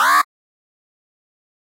1 alarm short a
1 short alarm blast. Model 1
gui, futuristic, alarm